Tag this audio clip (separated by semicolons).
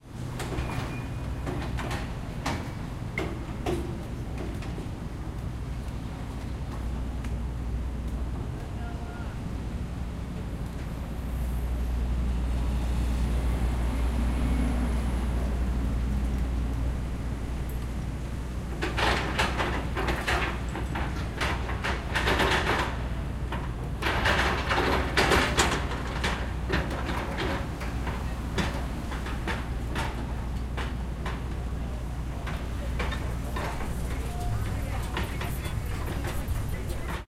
Point; Field-Recording; University; Park; Koontz; Elaine